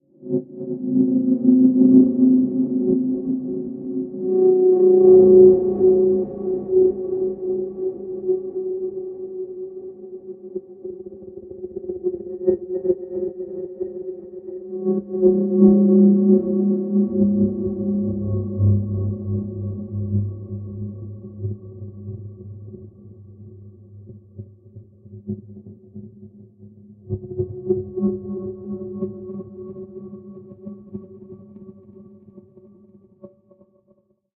Samurai Jugular - 14
A samurai at your jugular! Weird sound effects I made that you can have, too.
experimental, sci-fi, high-pitched, sound, sfx